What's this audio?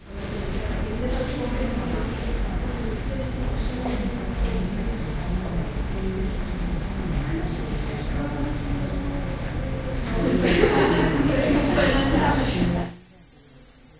The sorrounding environment of Casa da Musica, in a concert day. Some background laughter.
music-hall, public-space